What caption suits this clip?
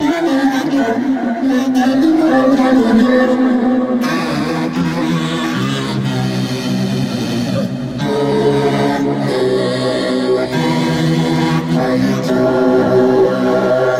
Damonic song Vocal
SFX conversion Edited: Adobe + FXs + Mastered
Creature, Ambient, Damonic, Horror, SFX, Dark, song, Monster, Chorus, Reverb, Creepy, Big, Vocal